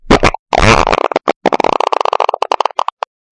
Sound was recorded with a PlayStation Eye and edited with Audacity.
METADATA:
Title: Fart #4
Album: Fart Sound Collection
Year: 2014
#: 4
Genre: Other
OTHER:
Size: 572 KB
flatulation, realistic, explosion, fart, flatulence, weird, gas, poot